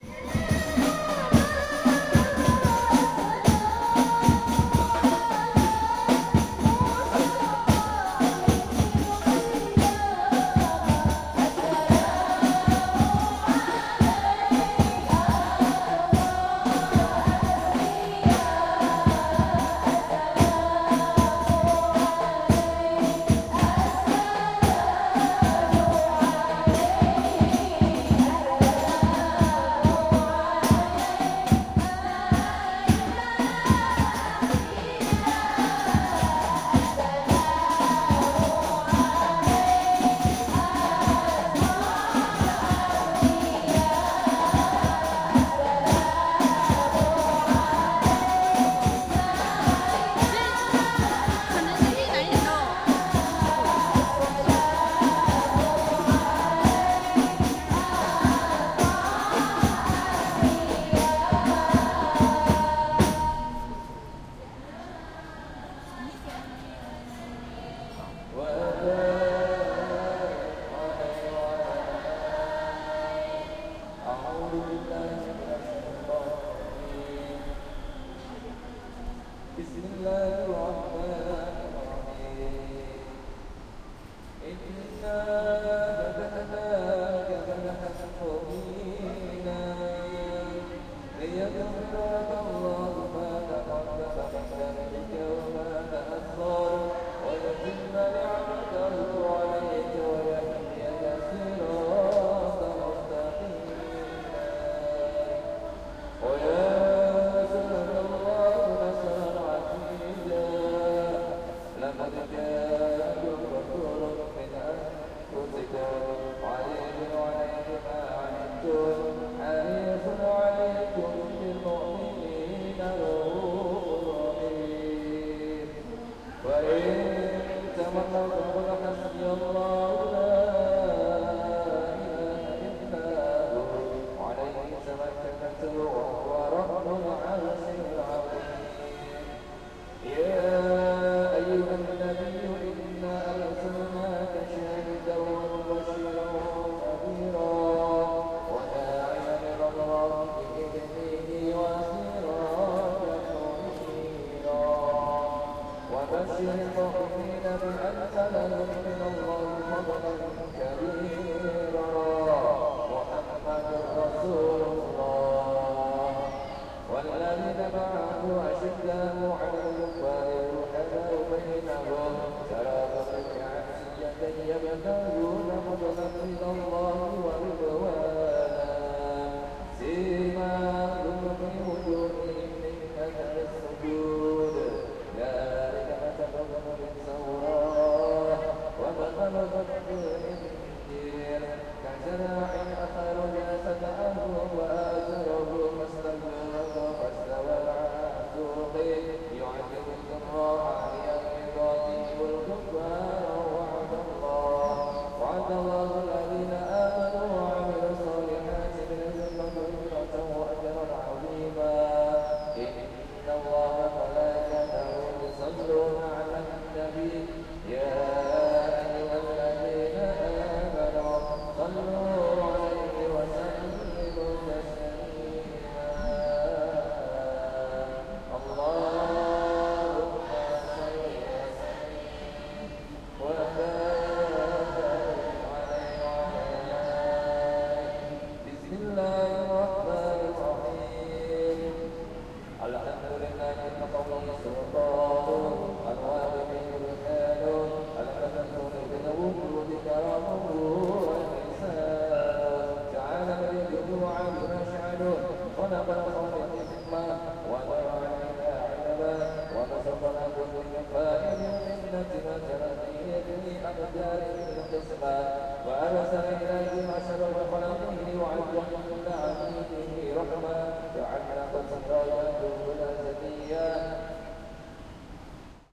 VOC 190210-1328 HK Indo
Indonesian domestic helpers chanting and praying in Kowloon Park, Hong Kong.
Every Sunday in Hong Kong, lots of Indonesian domestic helpers use to spend their holiday at Kowloon Park in Hong Kong.
Here, you can hear some of them singing and chanting, playing music, and praying with an Imam.
Recorded in February 2019 with an Olympus LS-100 (internal microphones).
Fade in/out applied in Audacity.
singing, religion, prayer, domestic-helpers, field-recording, drums, Kowloon-Park, voices, religious, praying, imam, atmosphere, Muslim, Sunday, song, Hong-Kong, music, chanting, soundscape, Islam, Indonesian, ambience